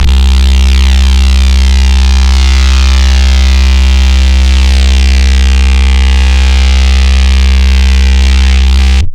ABRSV RCS 041
Driven reece bass, recorded in C, cycled (with loop points)
heavy, drum-n-bass, harsh, bass, reece, driven